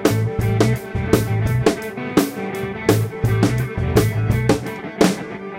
strontium-loop-jam
loop-able guitar and drum riff
drum, phrase, guitar